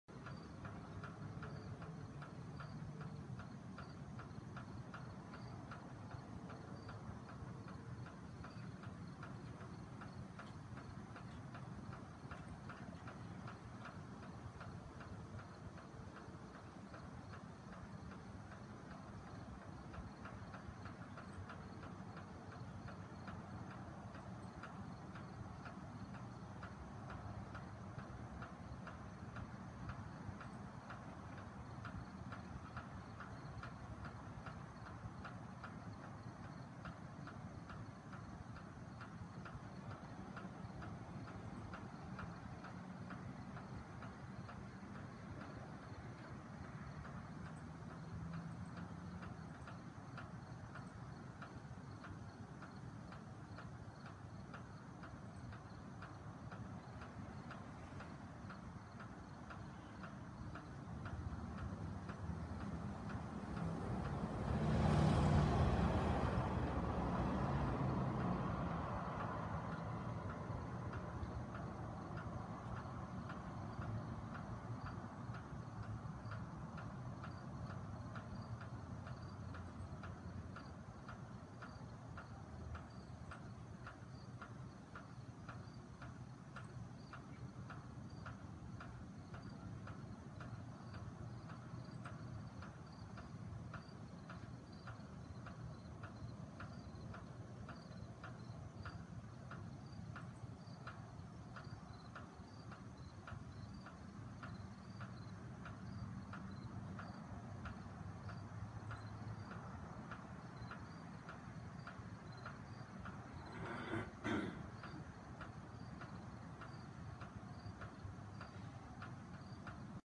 ambient rain gutter crickets car 02
New Jersey. Recorded on Ipad mini out the window of a house near street in residential neighborhood. Water tapping in rain gutter downspout. Crickets very soft in the background. A few cars woosh by on the street.
water, cars, tapping, ambient, crickets, street